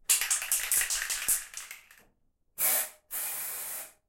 Spray Can Shake and Spray 3
Various shaking, rattling and spraying noises of different lengths and speeds from a can of spray paint (which, for the record, is bright green). Pixel 6 internal mics and Voice Record Pro > Adobe Audition.
aerosol, art, can, foley, graffiti, metal, paint, plastic, rattle, shake, spray, spraycan, spray-paint, spraypaint, street-art, tag, tagging